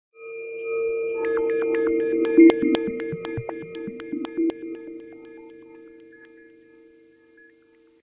CR - Space reflection
Good day.
Recorded with webcam - sound of cup + filter, reverb, delay.
Support project using
Atmospheric, ambient, atmosphere, background-sound, sci-fi